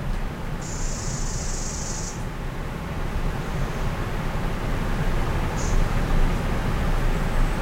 flowing water birdsong
water, birdsong